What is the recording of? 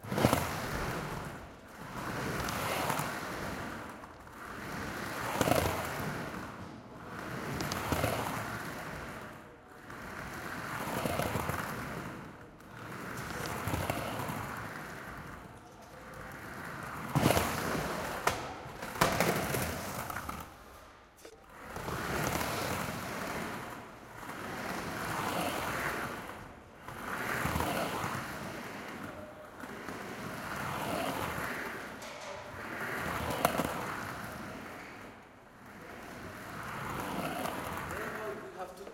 Skateboard Roll Stereo
Elementary, live, loading, midi, recording